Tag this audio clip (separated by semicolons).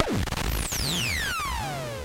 sidestick; tr-8; future-retro-xs; metasonix-f1; symetrix-501; tube